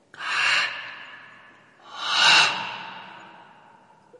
scream with echo. Recorded inside the old cistern of the Reina (Badajoz province, S Spain) castle. Primo EM172 capsules inside widscreens, FEL Microphone Amplifier BMA2, PCM-M10 recorder.